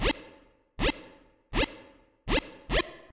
Live Wonk Synth 09
8bit, arcade, session, synth